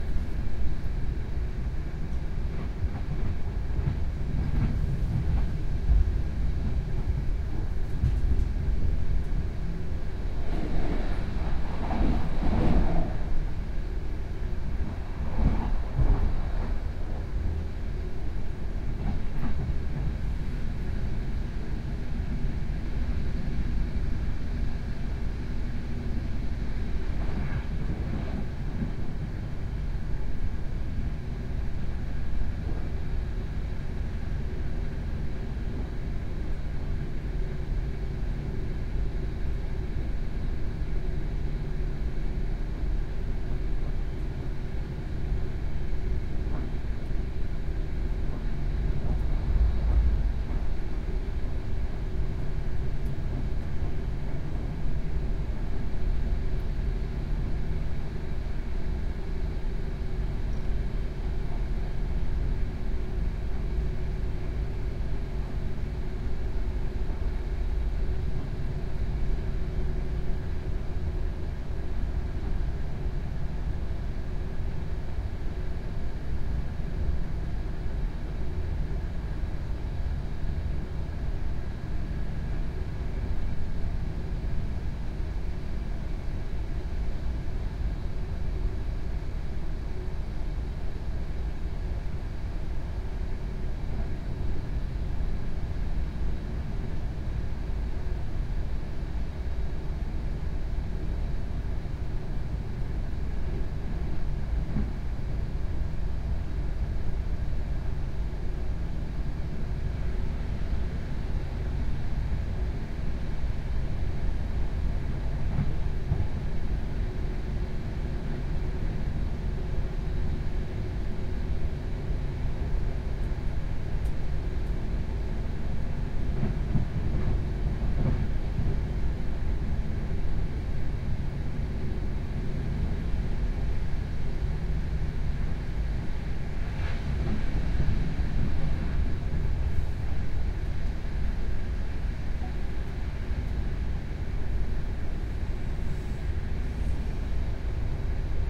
double-decker train passenger wiesel austria interior 01
An interior recording inside an austrian double-decker passenger train ("Wiesel").
Recorded with the Sony PCM-D100.
background-sound, wagon, ambient, inside, passenger, atmos, double-decker, pcm-d100, atmosphere, atmo, soundscape, austria, oebb, field-recording, railway, interior, ambience, travel, passenger-wagon, sony, wiesel, train